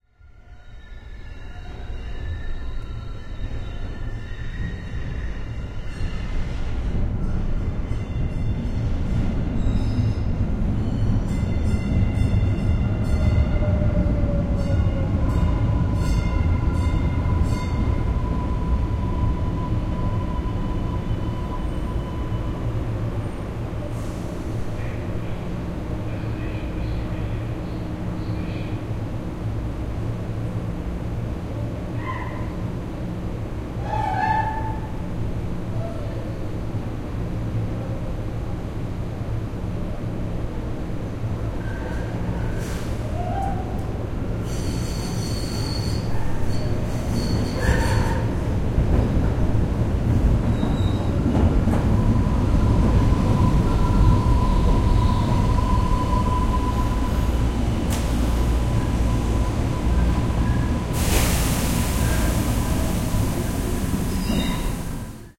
MTA TrainArrive StationAmb
Subway train arrives at station and station ambience